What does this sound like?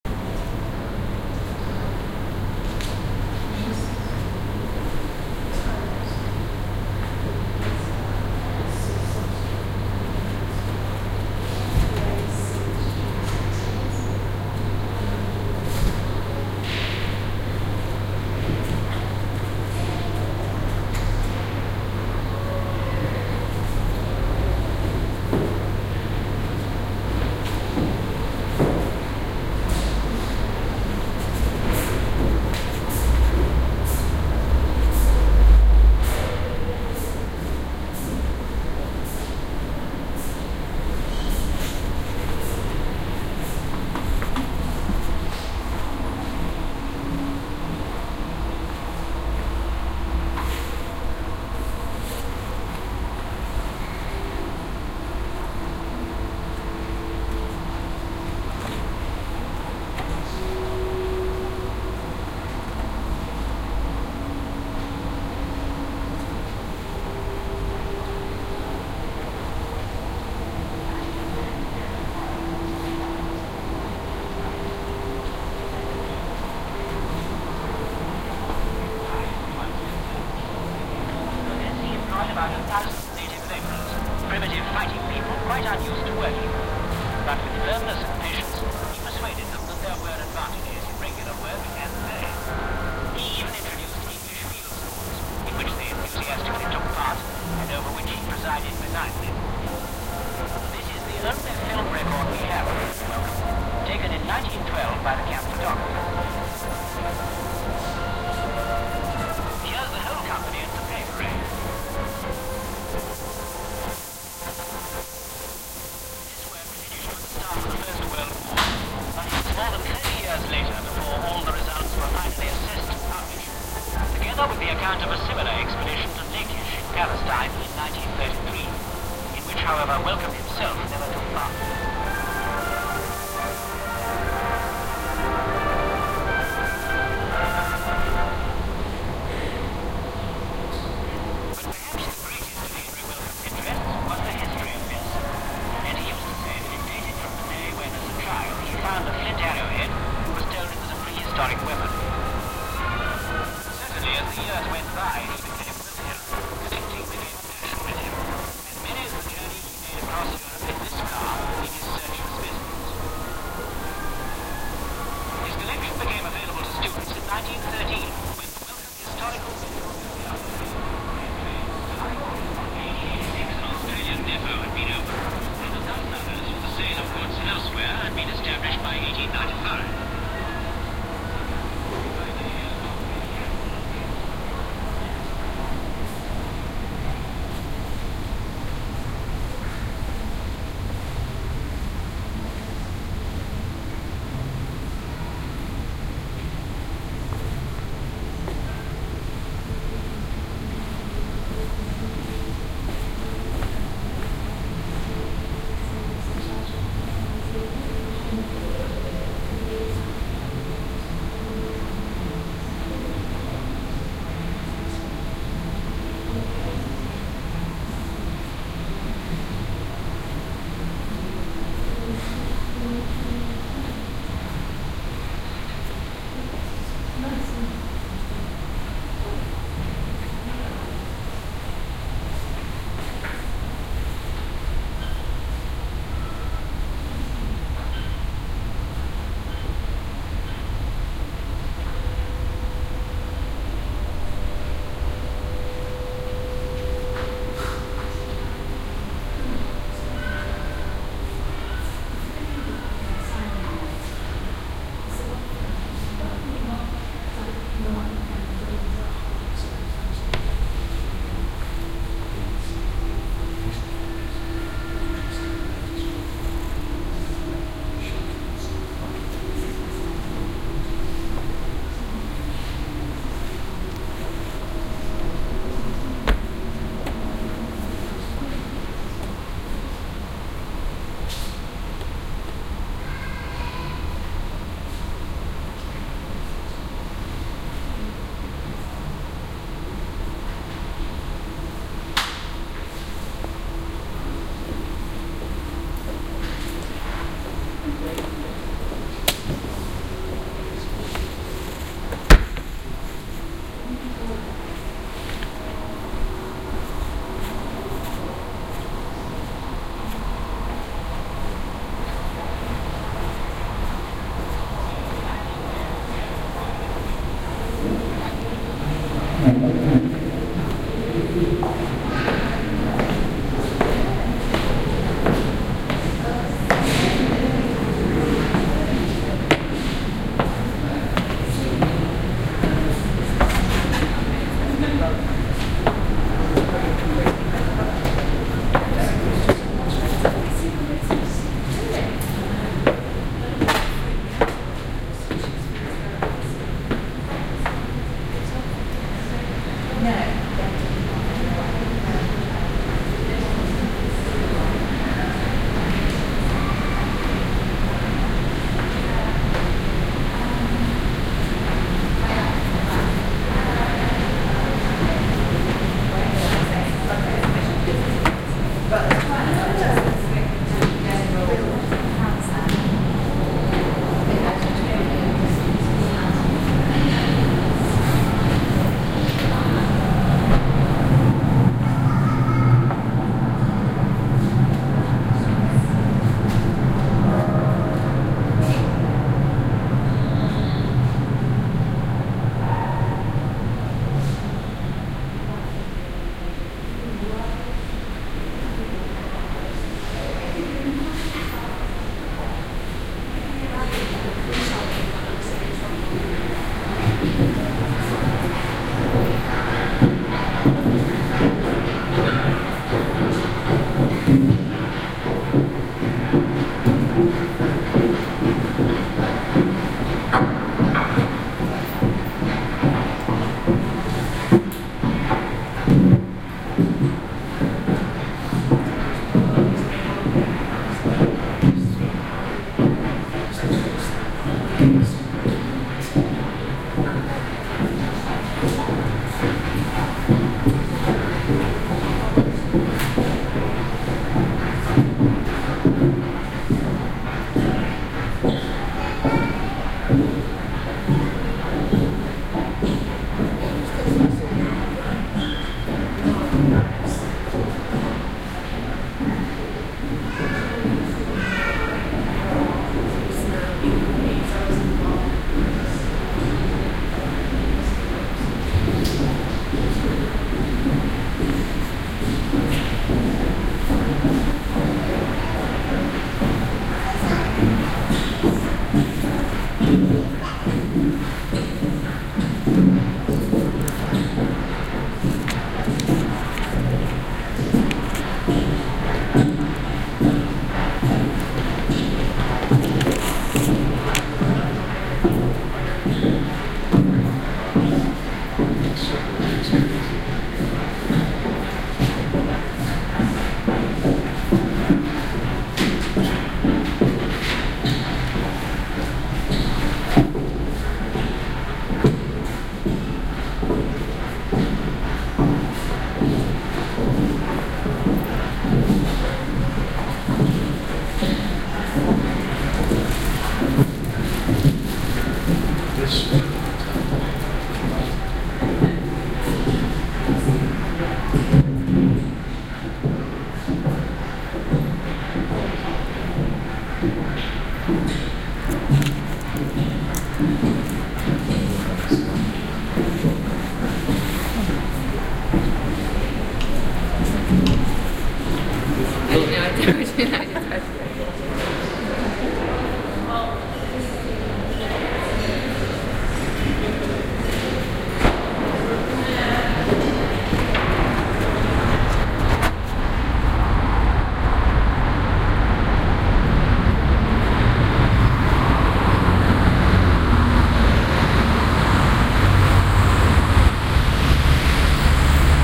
Euston - Welcome Collection Museum (History of Medicine + Madness and Modernity)
soundscape, ambiance, london, background-sound, city, general-noise, ambient, atmosphere, field-recording, ambience